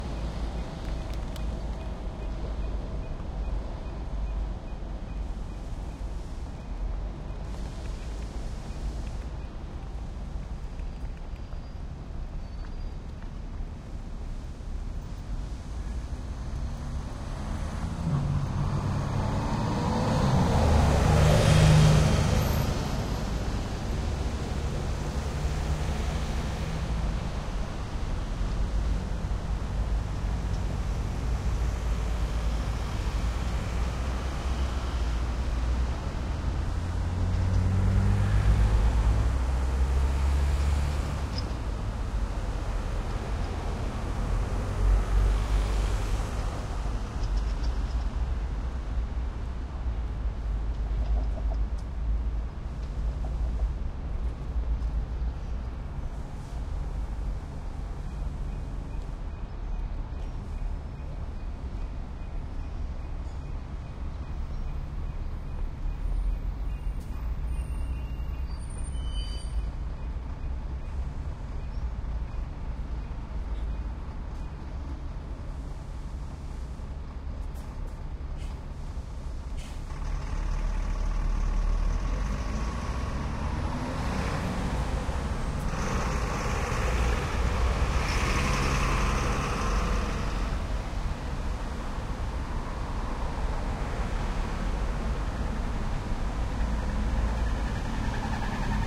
Traffic Light pedestrian Crossing in Bergen, Norway.
Recorded with a Sony PCM-M10